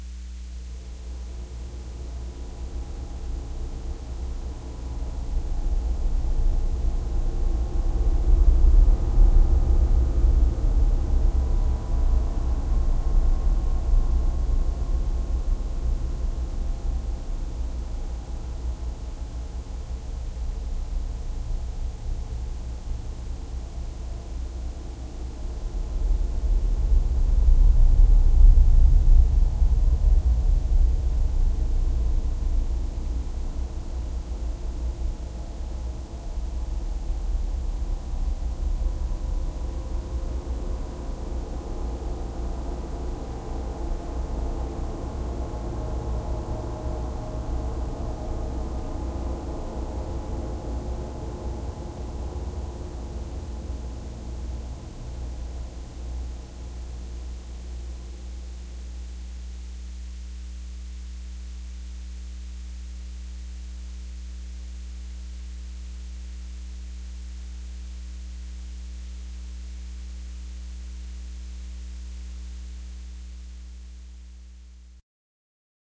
abyss ambience1
Unknown Sound I Made In My Bathroom First Sound I Record Is My Bedroom Roomtone I Use My Kid’s Laptop And I Recorded And Second Sound Is The Dark Ambience I Used My Objects In My Kitchen, Basement And My Attic I Use My Kid’s Laptop Again And I Record 37 Samples And 22 Generates I Really Too Busy To Edit All This Samples And Generates I Exported This Audio And I Uploaded In My Mom’s Cellphone And I’m Done Exporting Too Much Samples
Abyss, Ambience, Ambient, Atmosphere, Bathroom, Bedroom, Dark, Darkness, Drone, Effect, Effects, Home, Horror, Room, Roomtone, Scary, Sound, Tone